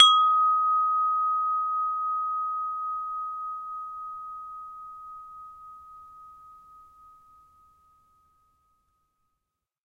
Windchime Eb3 a
windchime tube sound
tube, windchime, sound